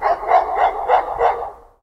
Dogs barking 6
dog, angry, dark, labrador, rottweiler, night, growl, dogs, bark, animal, barking, terrier, pet, growling, hound, mongrel, pitbull